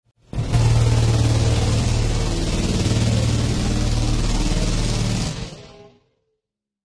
Large Alien Machine Call
A heavily proccesed saw wave. Meant to sound like the Reapers in Mass Effect.
Alien, Effect, Large, Machine, Mass, Reaper